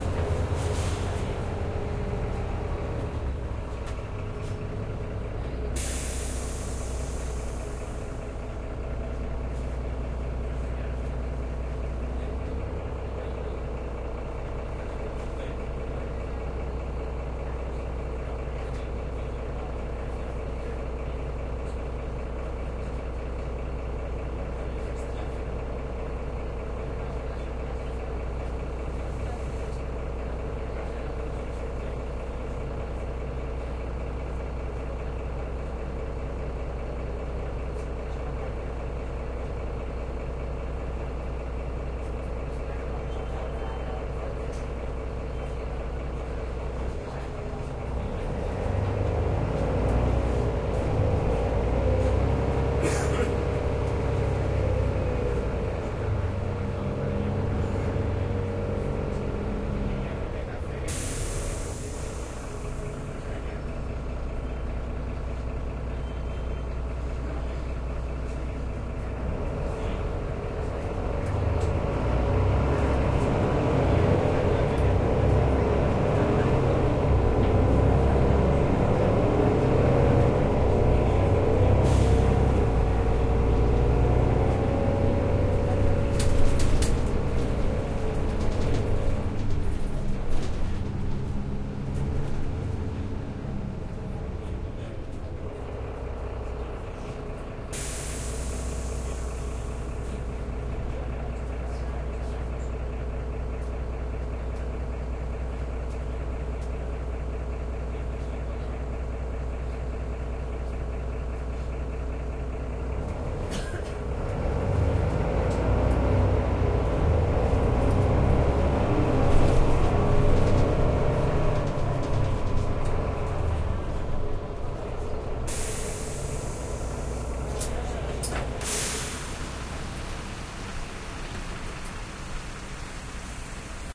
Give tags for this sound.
Barcelona; bus; interior; public; TMB; transport; transportation

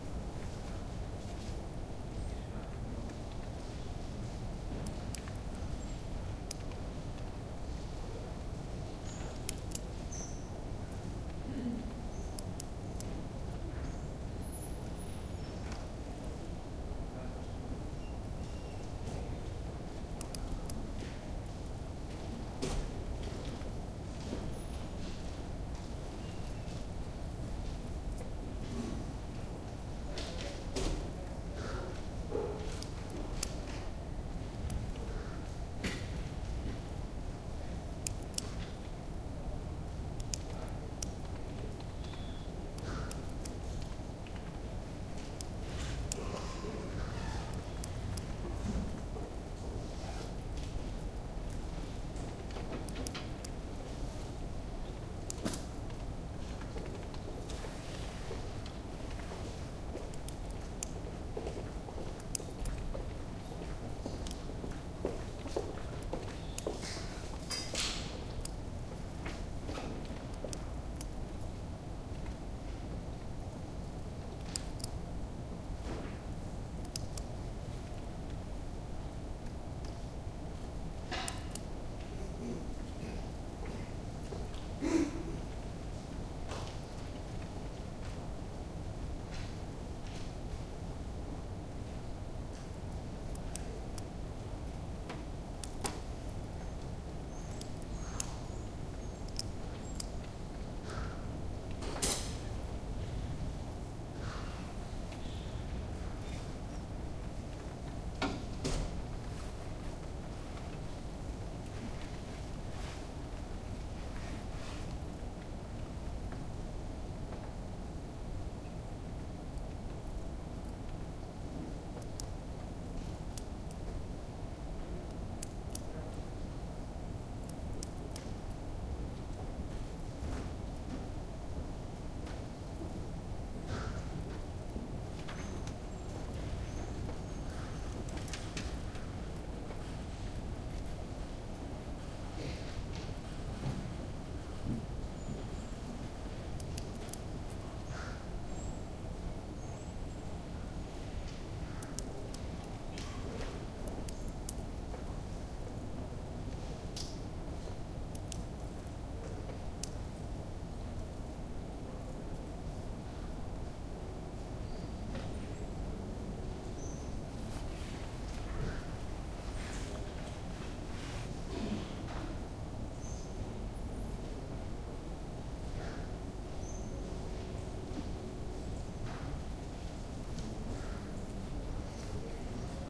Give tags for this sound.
library; field-recording; zoom-h2; ambience; university; netherlands; public-building; big-space

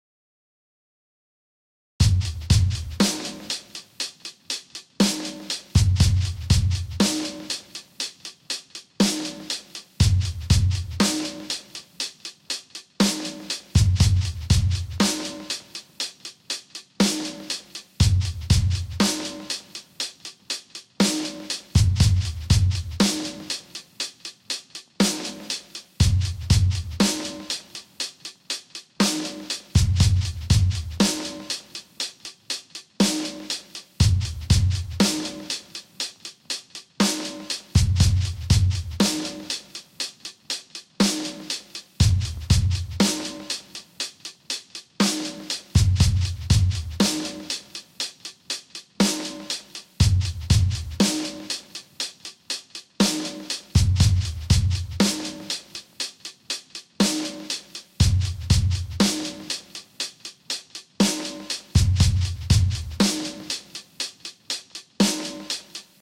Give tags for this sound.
beats; drum-loop; percs; percussion-loop

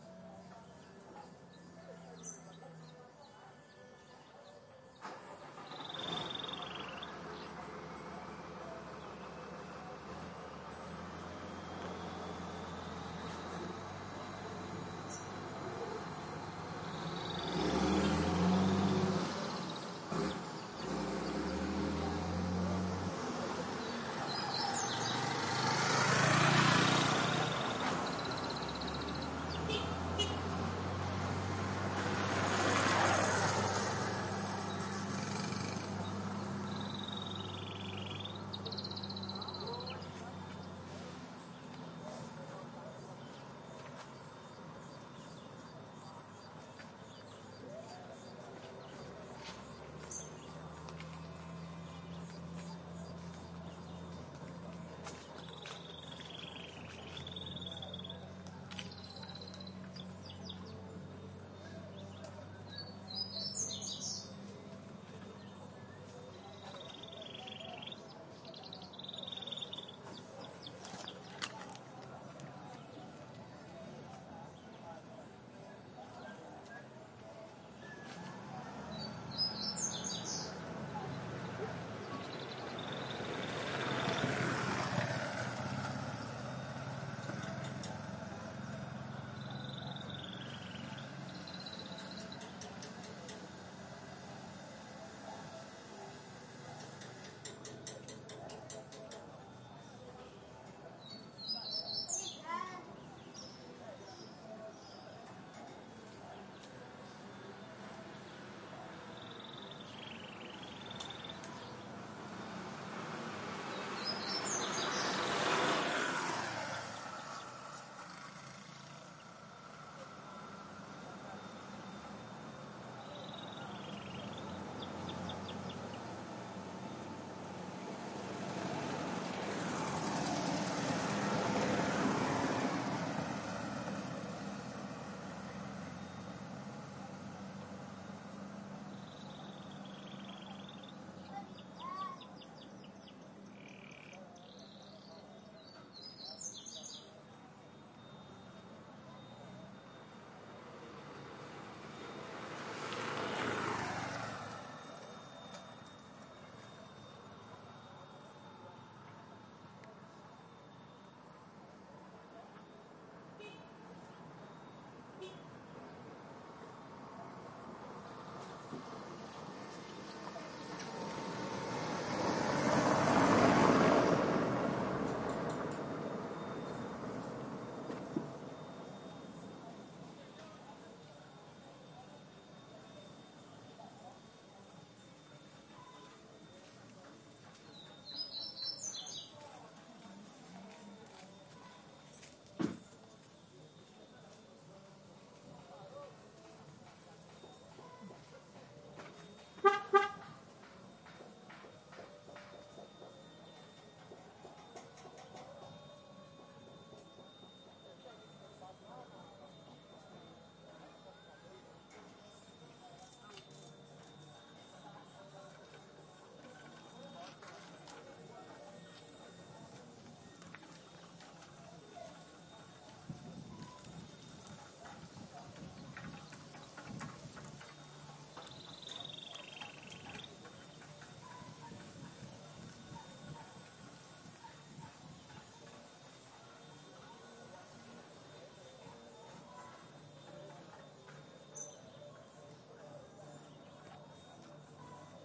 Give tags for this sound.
motores; river; motors; orla; passarinhos; birds; vento; field-recording; wind; faceira; carro; car

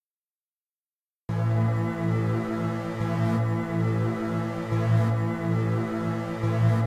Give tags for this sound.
dubstep; sound-fx; 140-bpm

